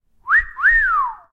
FietFieuw Wistle
I created a soundeffect with the wistle sound people make when they see someone the like.